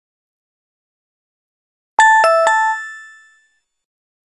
A sound effect I created in 3ML Editor.
sound, computer, startup, beep, effect